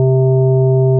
low C tone
generated C note
note, tone, c